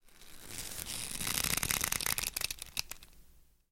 Leather Being Stretched - Foley
Large extension cable used to simulate a leather strap being tightened